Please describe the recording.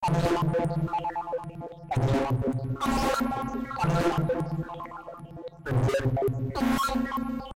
Water in the Cpu
industrial, loops, cpu, loop, fruity, robot, synthesizer, water, 64bpm